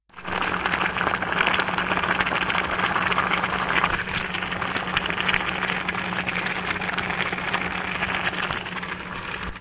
boiling bubbles 3 fast
A small recording of a pot with spaghetti noodles boiling inside. Version 3.